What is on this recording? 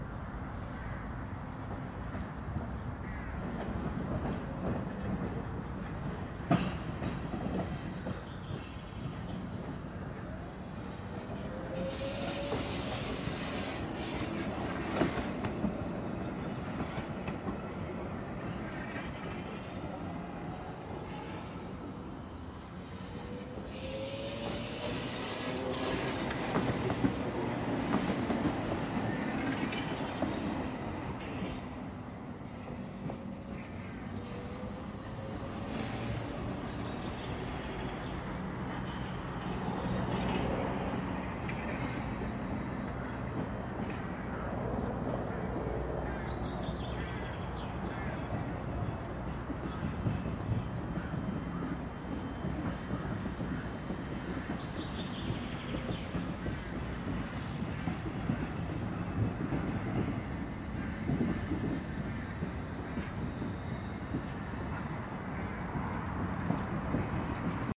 Sound of moving trams in the city of Minsk, Belarus. Taking using Rode Stereo VideoMic Pro on Canon EOS Mark 3.